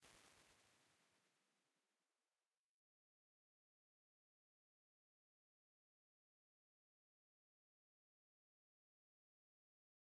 QV Hall dec70 diff3
Quadraverb IRs, captured from a hardware reverb from 1989.
convolution, impulse-response, IR